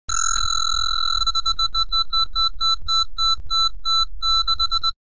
Found this little digital oracle at Wal-Mart.
You'd ask a question and press a button. Lights flashed and it made
this sound before lighting up next to your answer: yes no, maybe
definitely, no clear answer. I recorded it and edited it slightly with
a simple lapel mic.
beep, electronic, oracle, toy